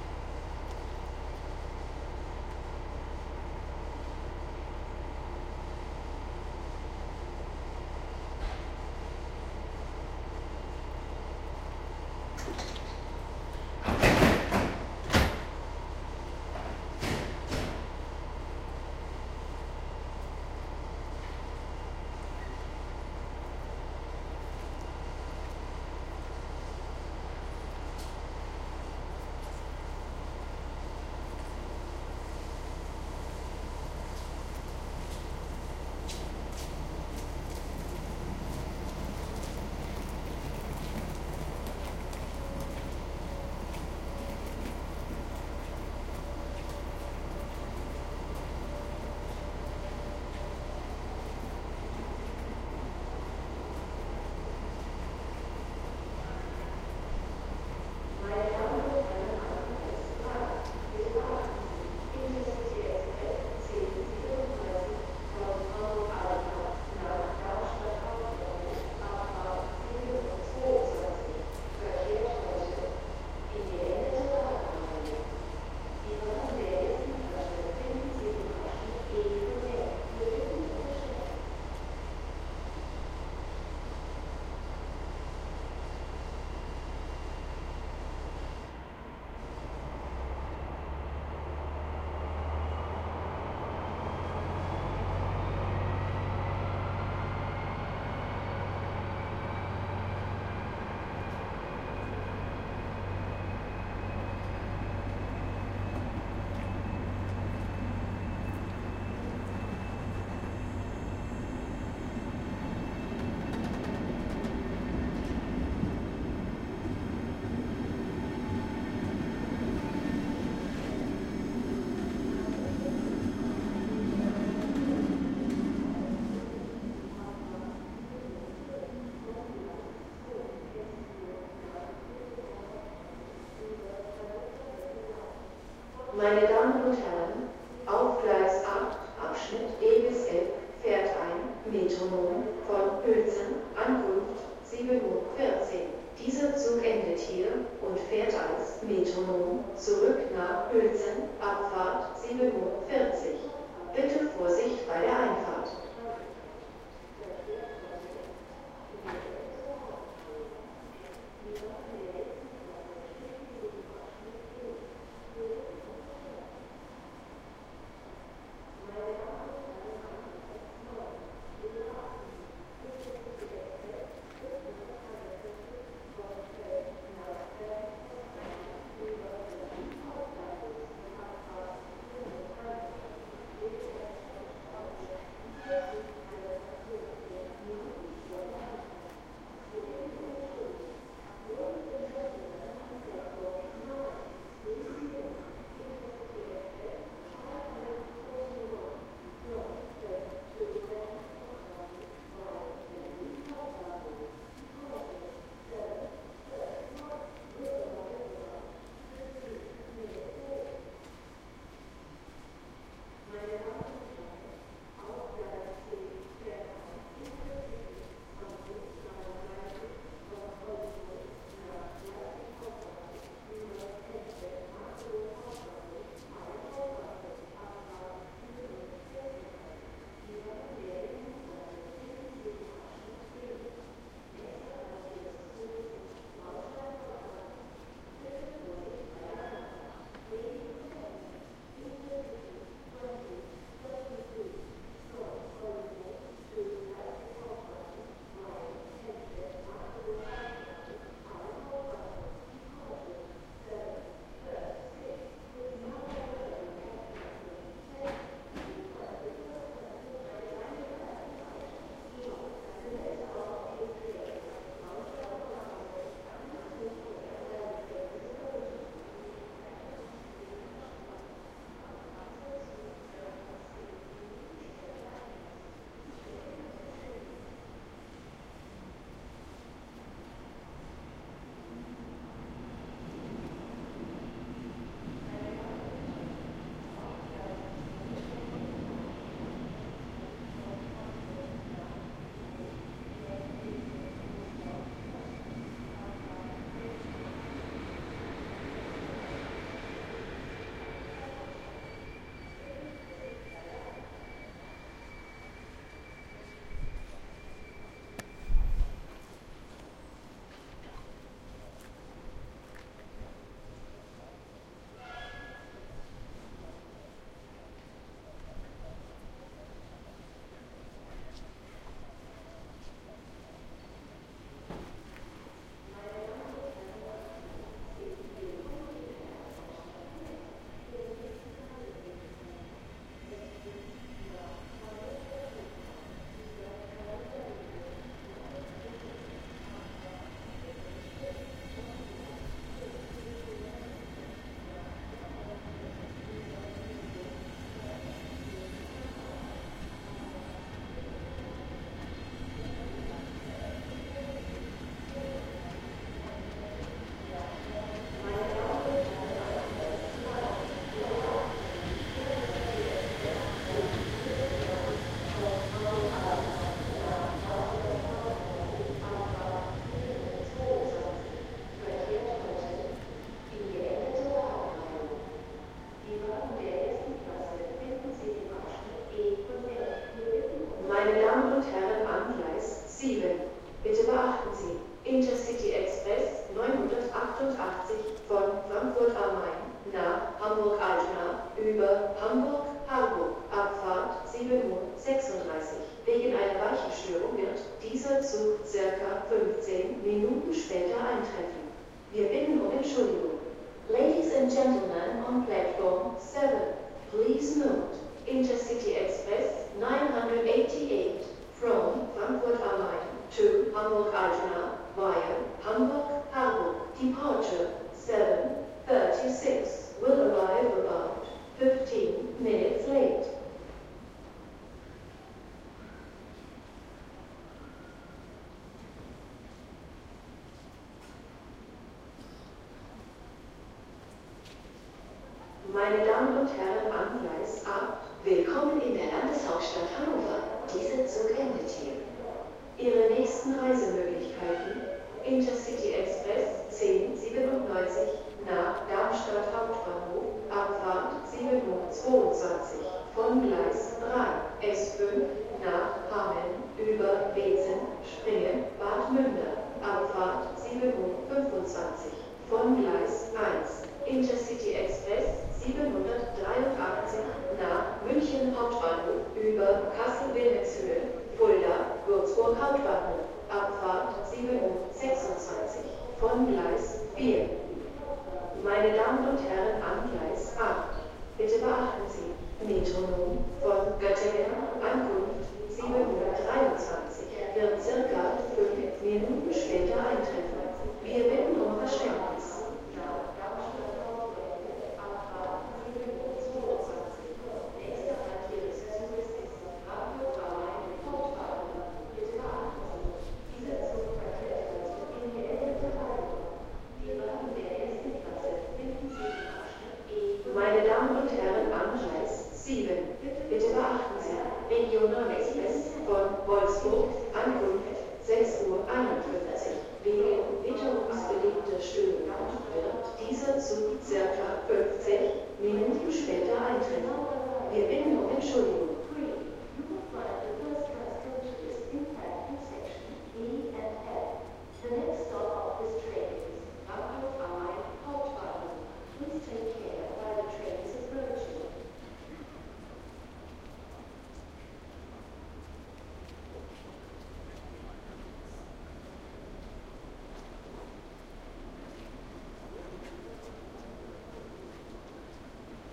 railwaystation SR001F
I recorded this track on a frosty morning on Hanover railway station. This recording was done with a Zoom H2 recorder. The most unusual feature of the H2 is its triple quadruple mic capsule, which enables various types of surround recordings, including a matrixed format that stores 360° information into four tracks for later extraction into 5.1. This is the front microphone track. With a tool it is possible to convert the H2 quad recordings into six channels, according to 5.1 SMPTE/ITU standard.
field-recording, railway, surround, h2, railwaystation